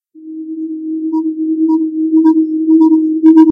andrea bonin01
Phaser, wahwa, ,g verb, baisser la hauteur, tremolo, fondu en ouverture, wahwa de nouveau, echo, suppression du bruit
typologie: itération complexe
morphologie: attaque douce et graduelle, en fondu
groupe tonique
grain d'itération
timbre terne, lourd
grain: rugueux
audacity, created, sound